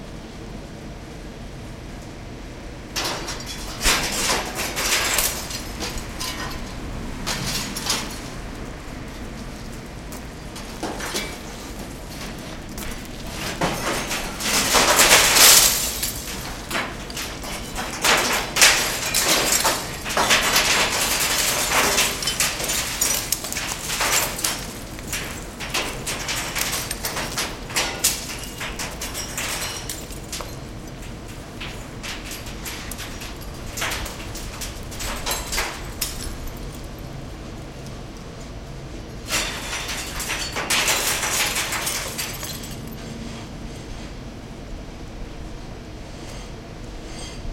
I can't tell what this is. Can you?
construction glass debris falling though chute into dumpster
chute, construction, debris, dumpster, falling, glass, into, though